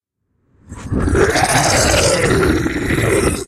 A zombie demonic sound. Support me by checking out my podcast: